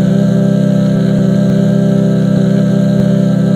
voice.CEG.chord
my voice (dubbed) singing a 3-note chord /mi voz doblada haciendo un acorde de 3 notas